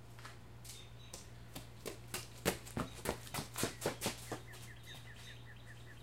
Quick footsteps from side to side in the stereo field.
running, steps, footsteps